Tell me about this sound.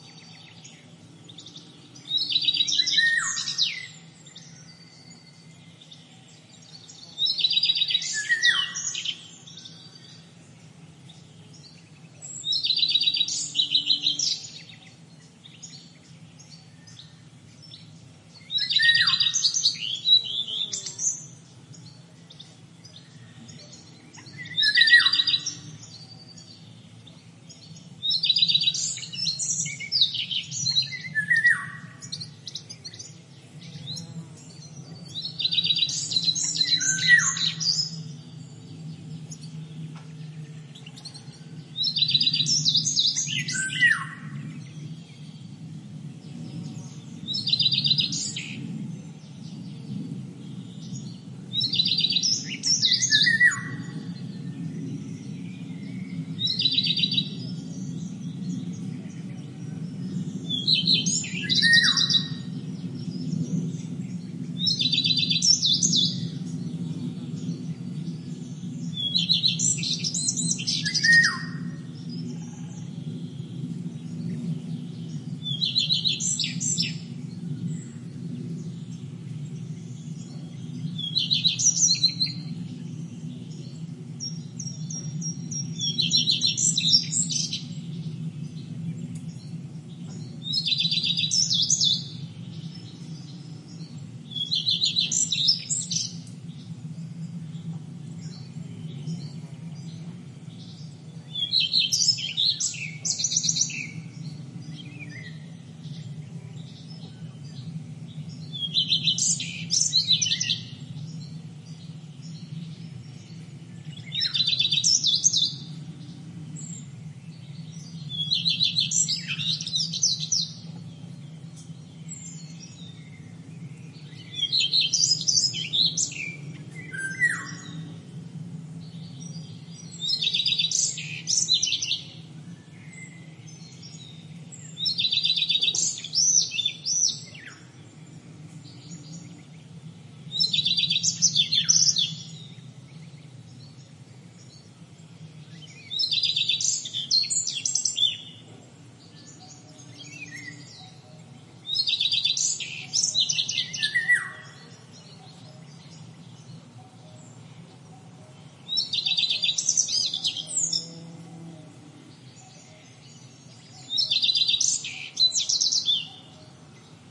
20190507.dehesa.day.calm.57
Spring ambiance on open Cork and Holm Oak woodland (Spanish 'dehesa', Portuguese 'montado'), with several singing birds in foreground (flute-like from European Golden Oriole, Chaffinch chirps, Cuckoo), and an airplane passing high. EM172 Matched Stereo Pair (Clippy XLR, by FEL Communications Ltd) into Sound Devices Mixpre-3. Recorded near Aceña de la Borrega, Caceres province (Extremadura, Spain)